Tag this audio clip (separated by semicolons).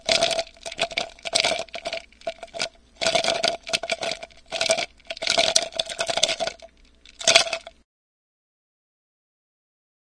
crushed; ice-cubes; shake; water